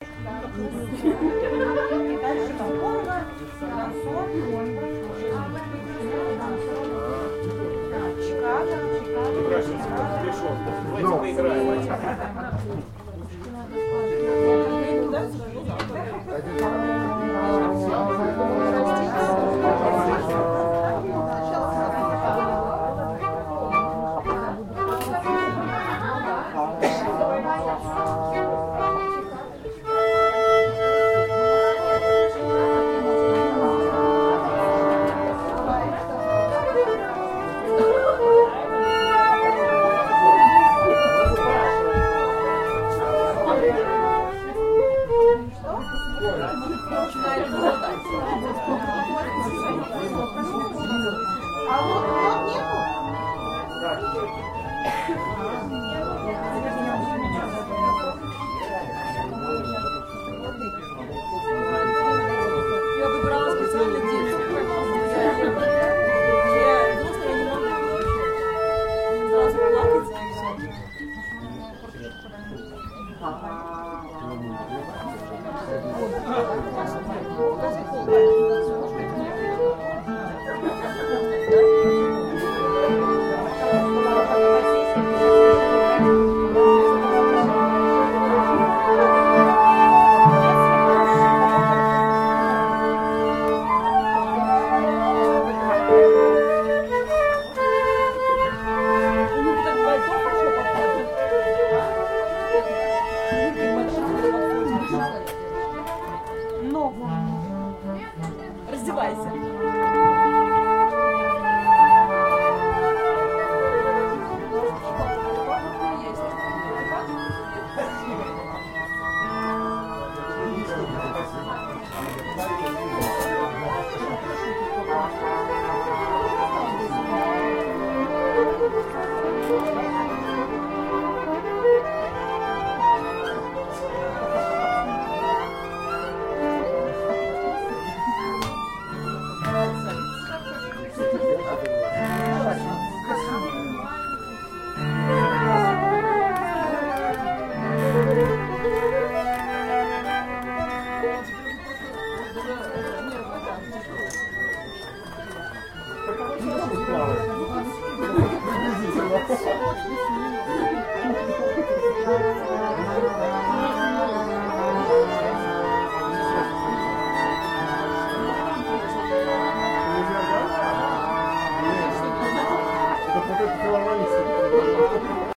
Orchestra prepare to play
Open air playing. Orchestra prepare. Vitebsk's Philarmonic
tuning, backstage, orchestra, audience